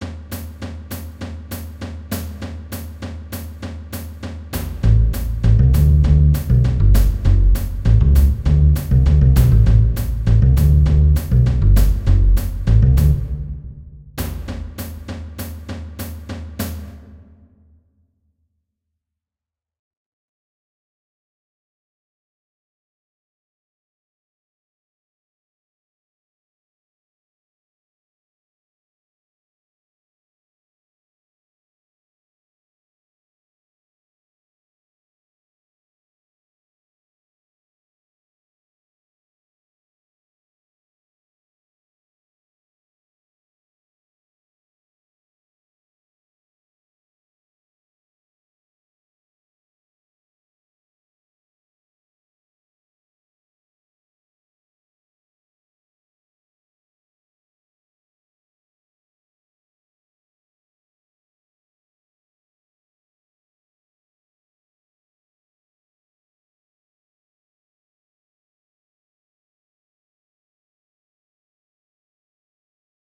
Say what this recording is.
Harambe, The Bush Kangaroostart
An introduction to a jazz song I have been working on. It has a clear drum and double bass part on the start. Made in a program called musescore
Beat, song, Drums, Tom, Jazz, Bass, Guitar